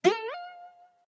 Comic sound of question mark.
Comic, Mystery, question